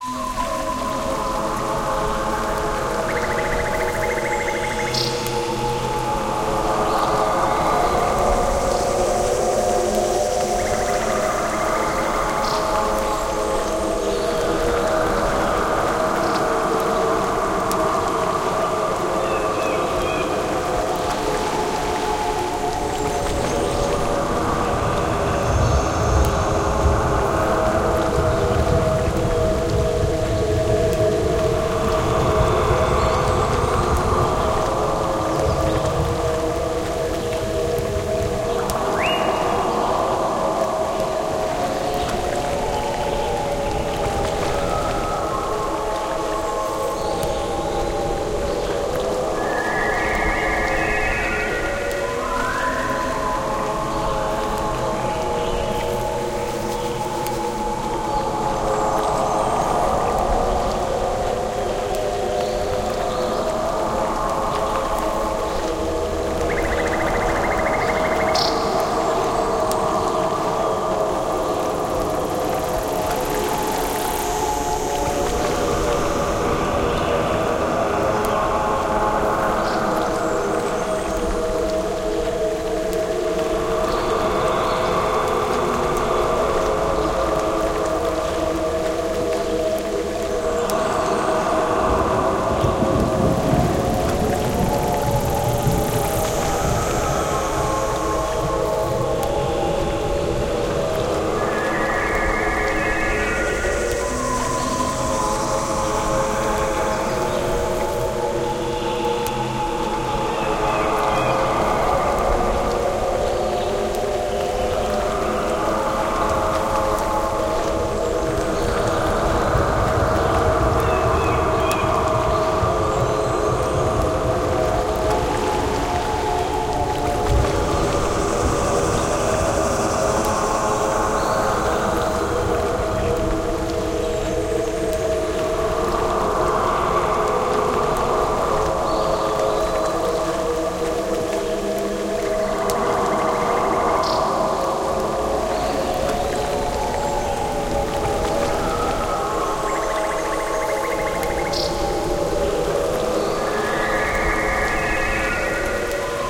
Forest jungle nature dark Atmo
Ghost, Movie, Outdoor, Birds, nature, Strange, Fantasy, ambience